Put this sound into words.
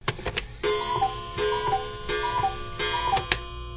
G4 cuckoo in clock
a cuckoo is singing at a classic wall mounted clock